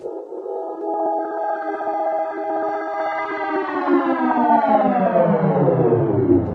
Mangled snippet from my "ME 1974" sound. Processed with cool edit 96. Some gliding pitch shifts, paste mixes, reversing, flanging, 3d echos, filtering.
voice, child, human, processed, stereo